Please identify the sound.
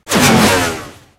Effects recorded from the field of the ZOOM H6 recorder,and microphone Oktava MK-012-01,and then processed.
abstract, cinematic, dark, futuristic, game, glitch, hit, metal, metalic, morph, moves, noise, opening, rise, Sci-fi, stinger, swoosh, transition, woosh